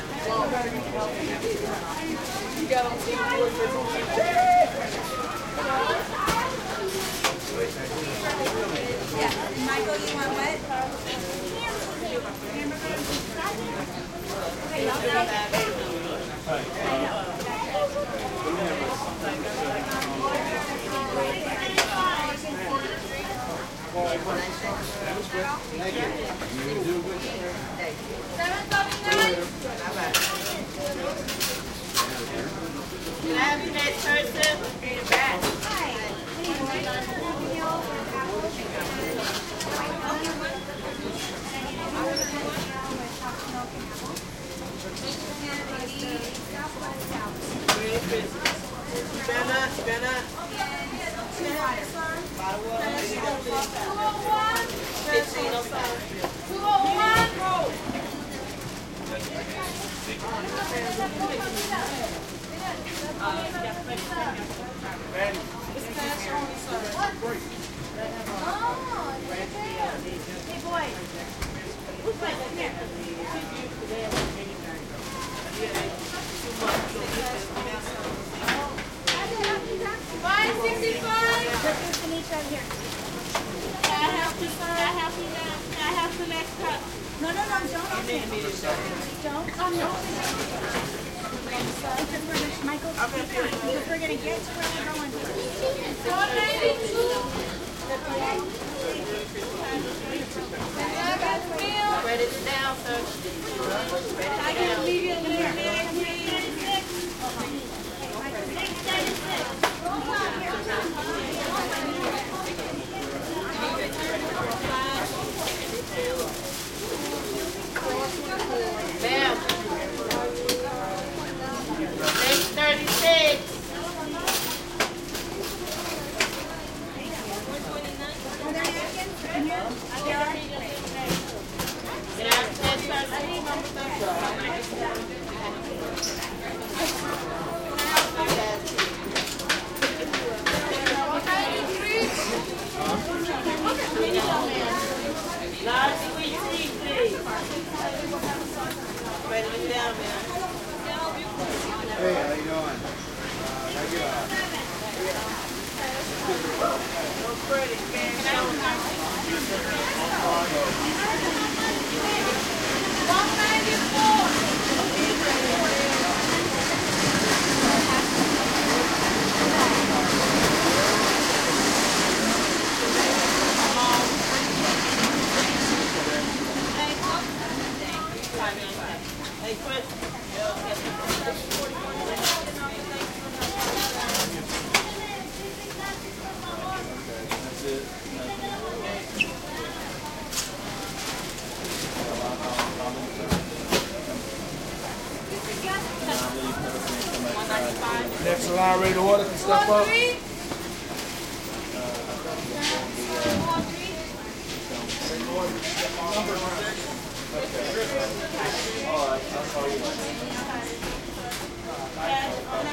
fast food restaurant ordering counter calling customer numbers busy american voices
numbers, restaurant, fast, food, calling, counter, voices, busy, customer, ordering